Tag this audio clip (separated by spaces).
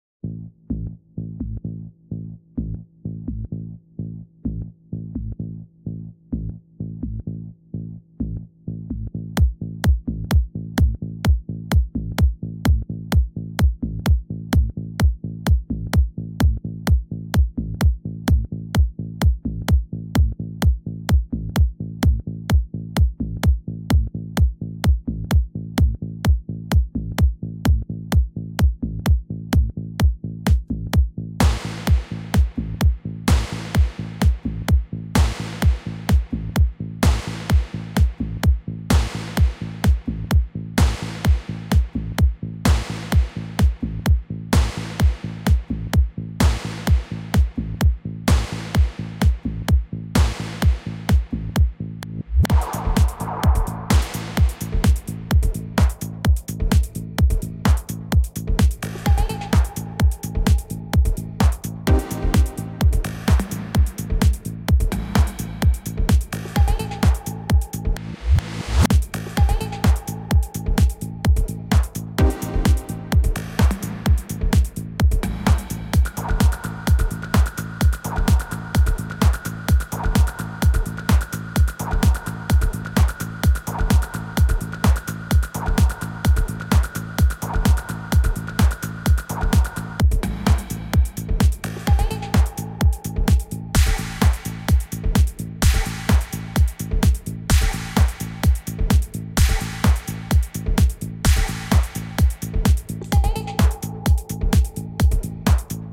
Clap Dance Drum EDM Electro FX HiHat House Loop Minimal Percussion Techno